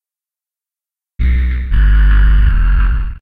guacamolly pan bass combo
Reverse, pitch drop, version of _pan_bass. Notes... high to low. Not as much pan.
bass, grind, low